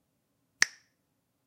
snap dry
A single snap without effects. Have fun.